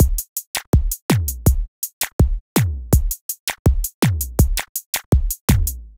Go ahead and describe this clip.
Wheaky 1 - 82BPM
A wheaky drum loop perfect for modern zouk music. Made with FL Studio (82 BPM).
drum, zouk, beat, loop